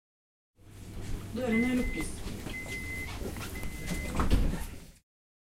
Subway in Oslo
Metro in Oslo: voice says: DØRENE LUKKES ( the doors are closing)